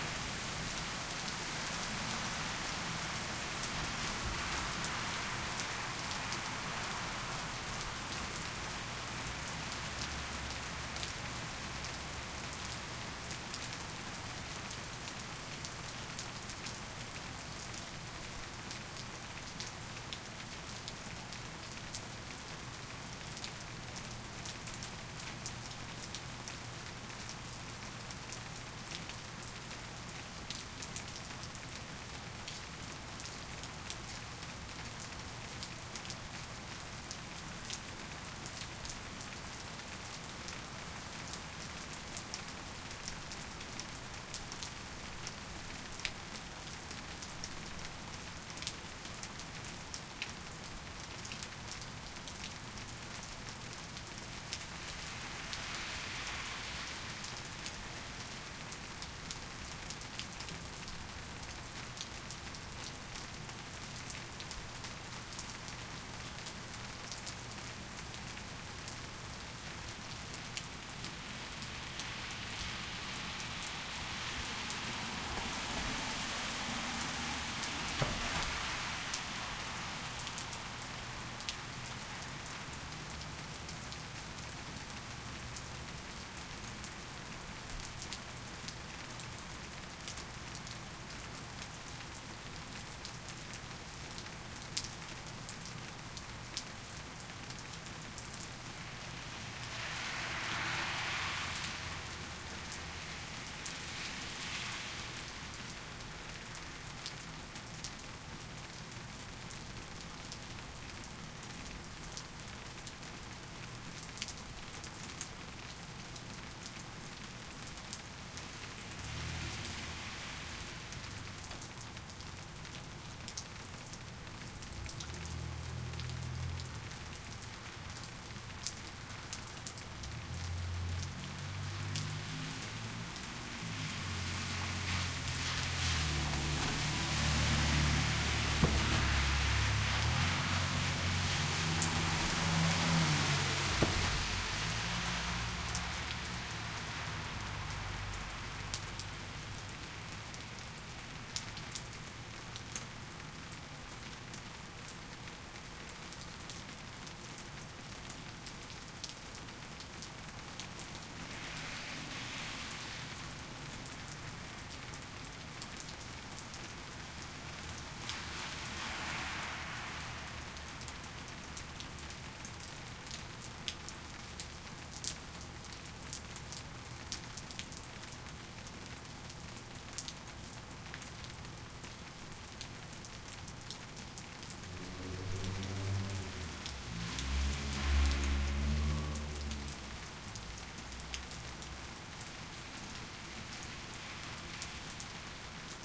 traffic light backstreet rain drops on front steps and stream from high gutter Havana, Cuba 2008